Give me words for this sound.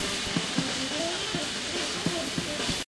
nyc washjazzsnippet2
Snippet of ambiance recorded in Washington Square in Manhattan while a saxophonist and a drummer improvise and the fountain hosts strange modern art performers recorded with DS-40 and edited in Wavosaur.
field-recording, fountain, jazz, new-york-city, washington-square